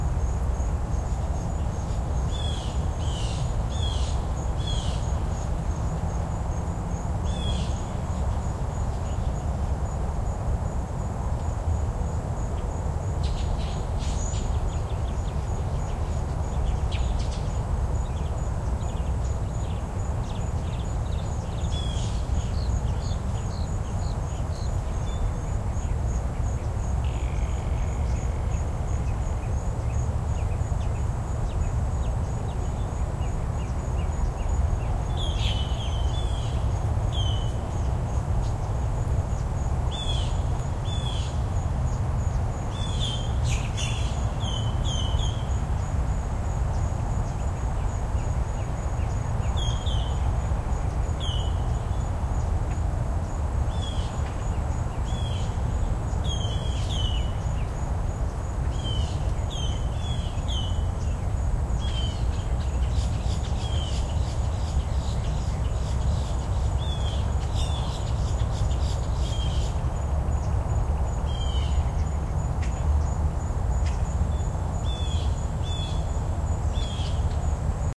bidding the birds farewell
Leaving the birds while recording made during early morning walk through a nature trail with the Olympus DS-40/Sony Mic.